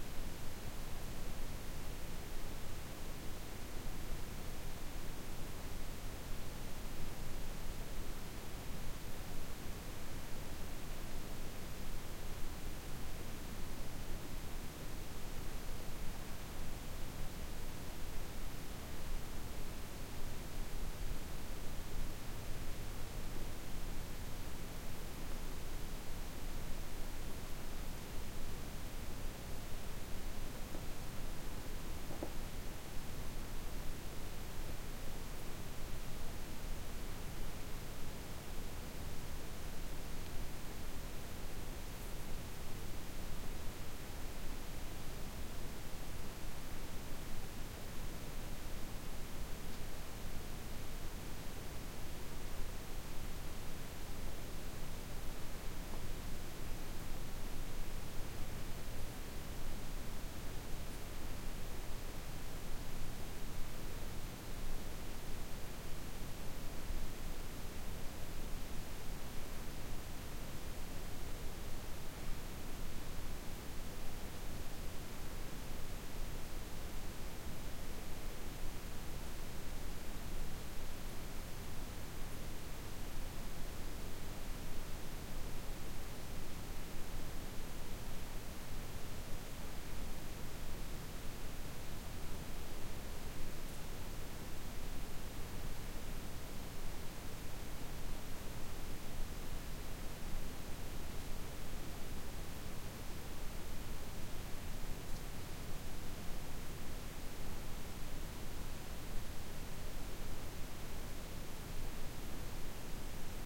room tone cellar dead quiet- use very low breath tone
room, dead, cellar, tone, quiet